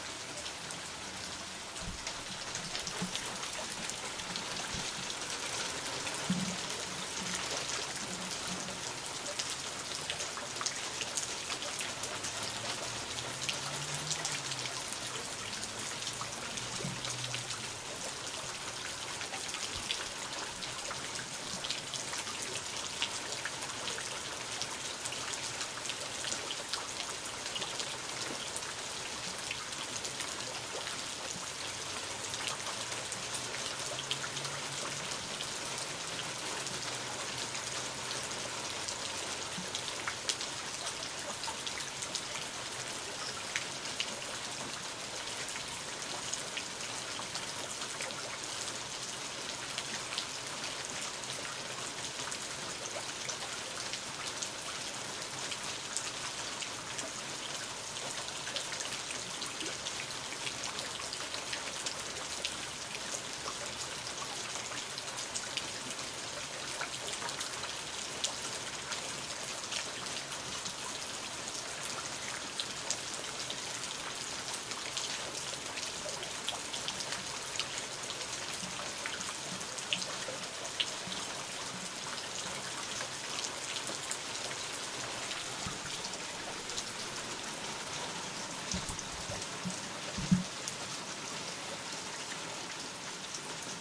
Rain Fountain Splashes Far Away
This is a recording of water splashing into a bucket while it is raining from far away.
I have uploaded a closer version as this one is about 5 Feet away.
rain, rain-fountain-splashes, weather, fountain, field-recording, background-noise, splash, nature, ambience, water, ambient